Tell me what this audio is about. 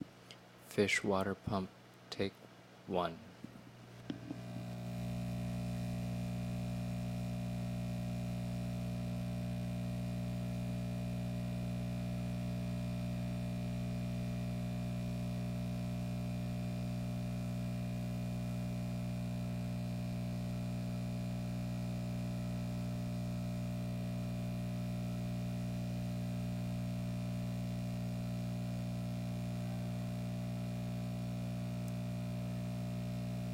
aqarium water pump with buzz. NTG-2, Tascam-DR60D